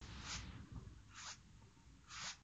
Rough brush on smooth surface.